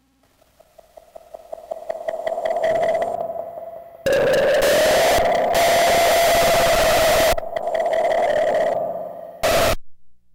Static build and scream intense 3
Weird static build I made on Korg EMX, noise reduced on Audacity